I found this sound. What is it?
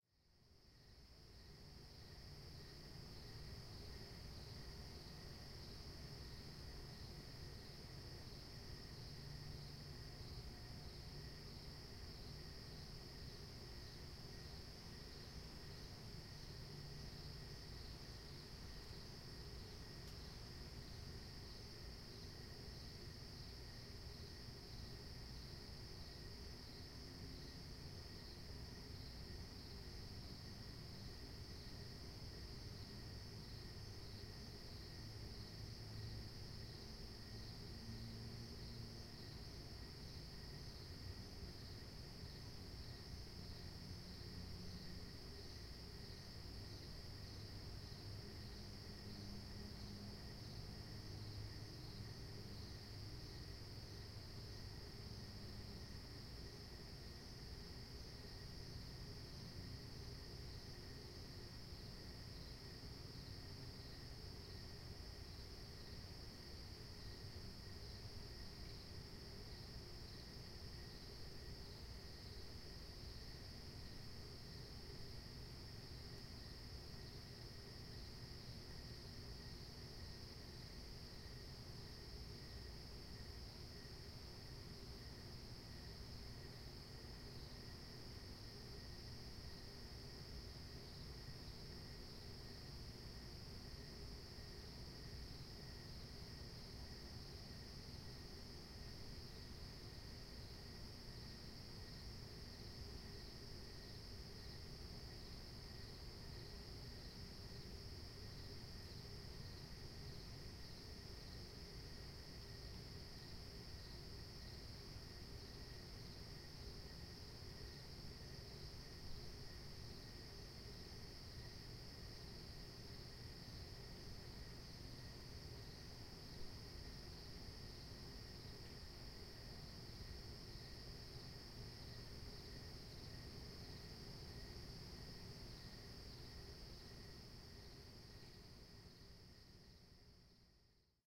SUBURB LATE NIGHT DISTANT HIGHWAY FRONT ST 01
suburbs with crickets 11pm front pair of Samson H2 surround mode - (two stereo pairs - front and back) low level distant sound highway